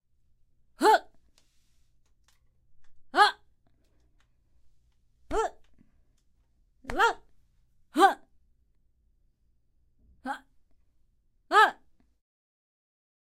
75-Murmur Surprise Man
Murmur Surprise Man